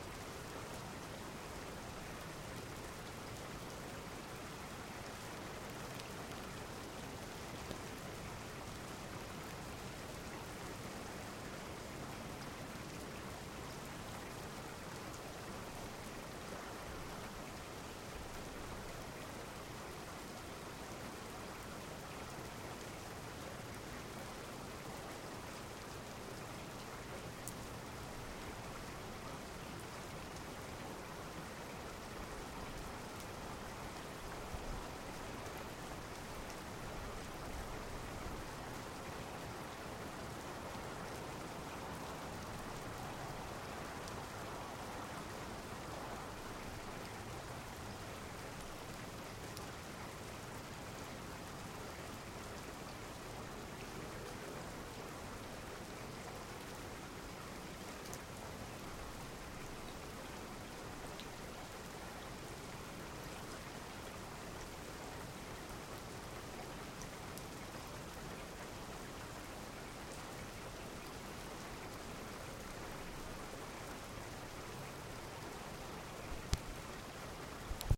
LightRain Urban
A field recording of light rain as it falls steadily in a hilly suburban area near the Pacific Coast Highway and sea shore. The microphone was placed on a second-story window facing the street, though there was no traffic. You can hear the rain falling onto the roof, nearby pavement, trees, and ground. An unedited 1 minute sample.
down-pour, field-recording, rain, raining, white-noise